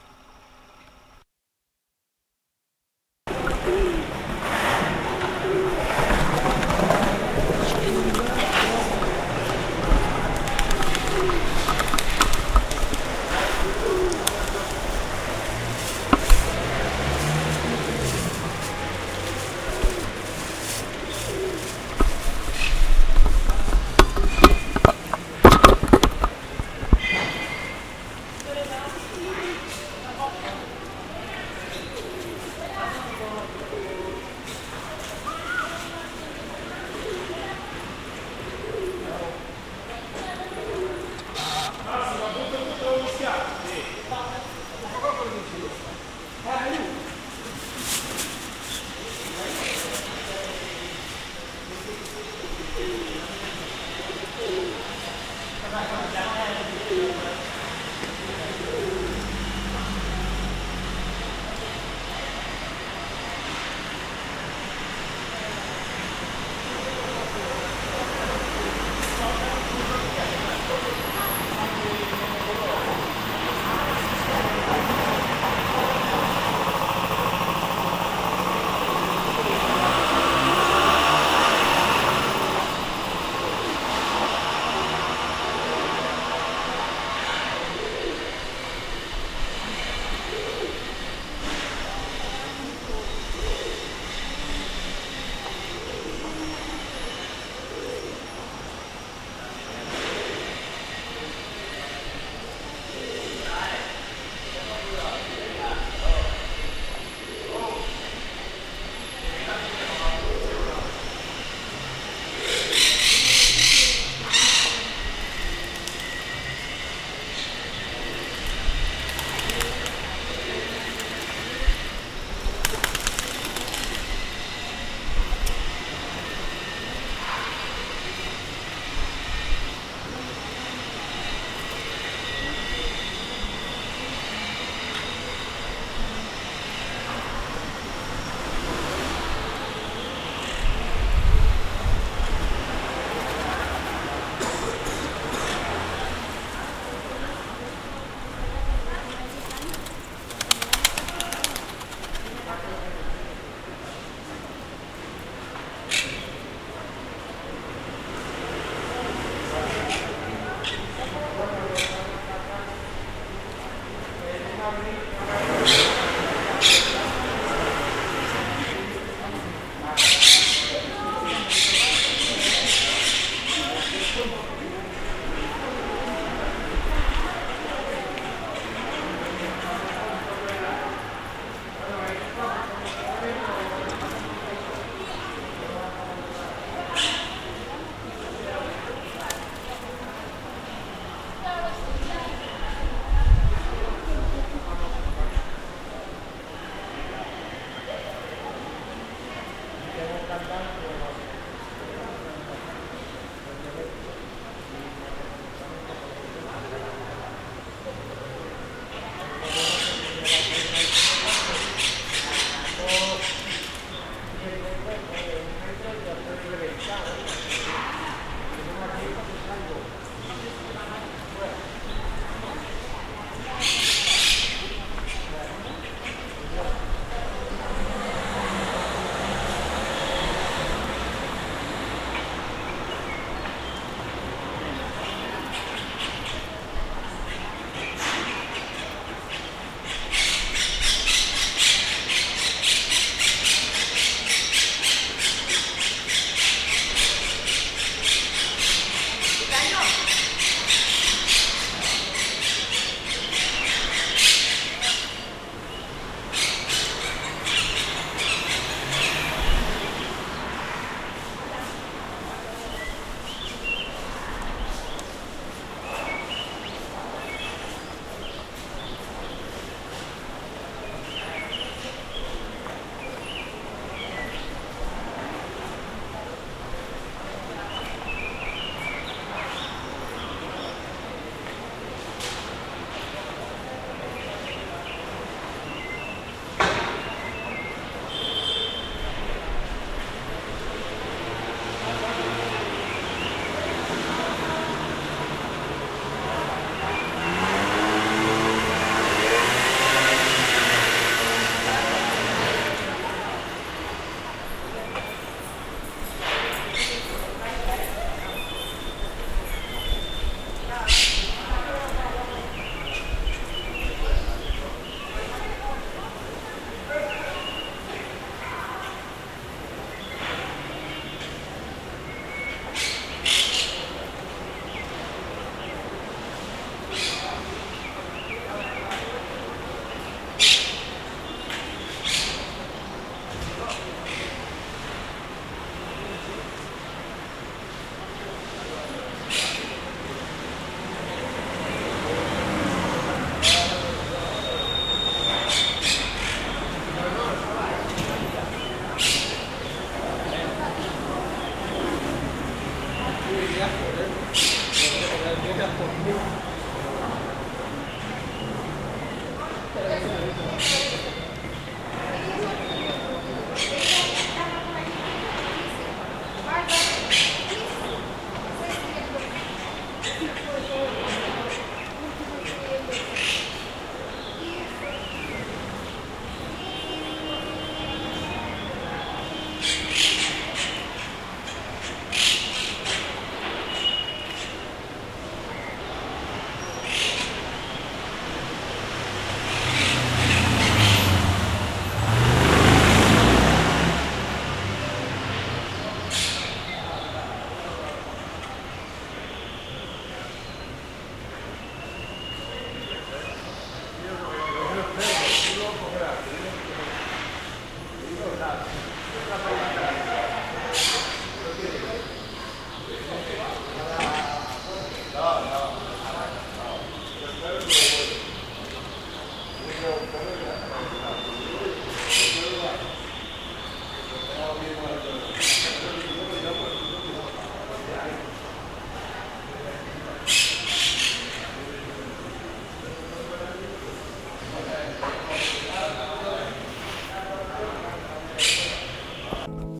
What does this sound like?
I heard some birds making a ruckus in a small plaza in the raval zone of Barcelona and stopped to make a recording. You can hear cars and motorbikes passing, people talking, an electric saw or something, birds chirping and pigeons flapping their wings. Mono, I'll upload the stereo version eventually.
ambience, ambient, barcelona, birds, cars, field, life, minidisc, mz-r50, passing, recording, street